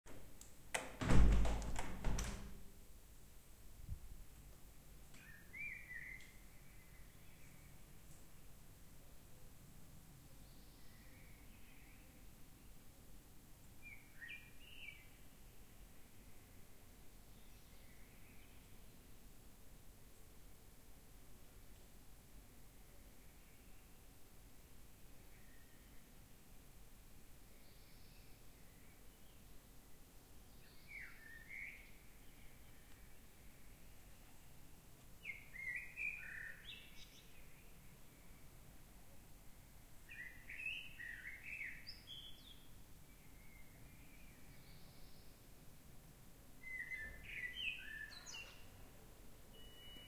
This song is recorded in the morning when i opening window and birds singing